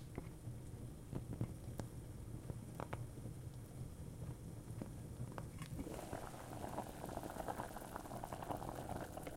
Sound of boiling water.